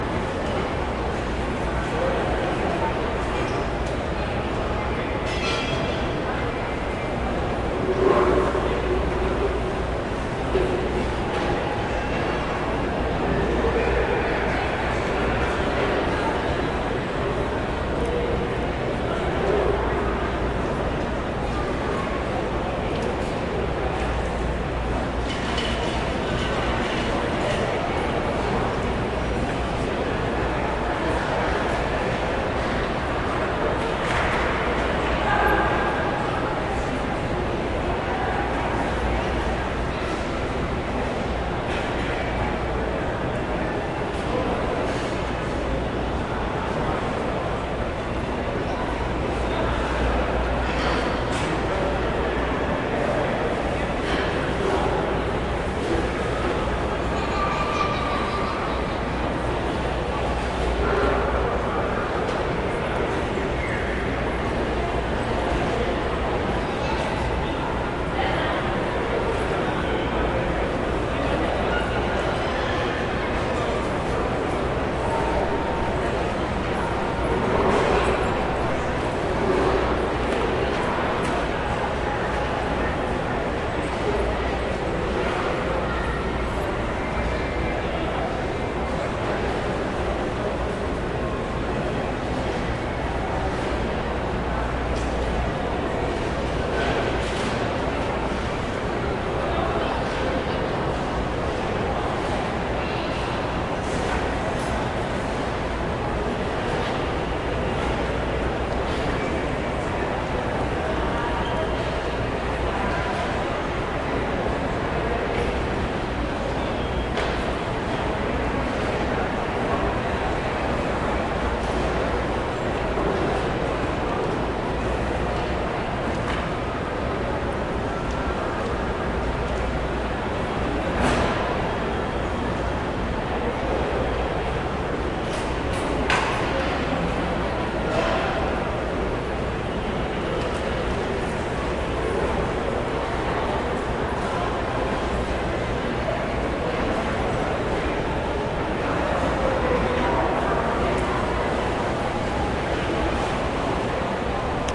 FoodCourthNPMall Evening
Recorded in the North Park Mall Food Court. Evening 8:00 p.m.
court, evening, food, mall, north, park